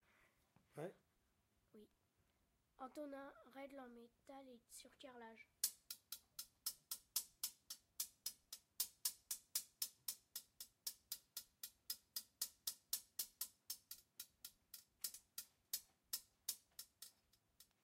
Sounds from objects that are beloved to the participant pupils at La Roche des Grées school, Messac. The source of the sounds has to be guessed.
France, messac, mysounds